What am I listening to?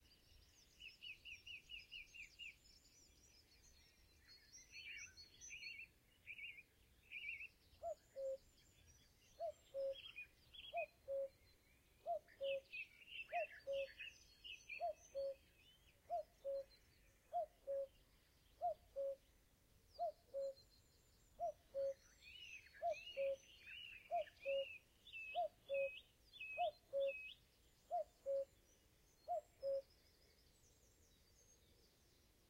Cuckoo recorded in The Cotswold Waterpark Gloucestershire England. Homemade budget parabolic system using Sony ECM-MS907 mic and Edirol R-09HR recorder.